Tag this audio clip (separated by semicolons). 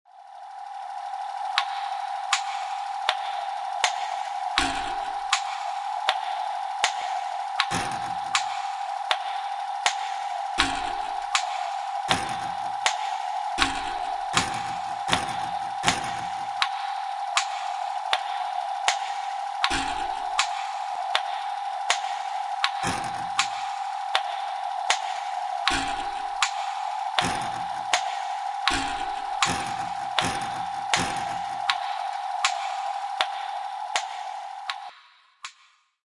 cartoon; machine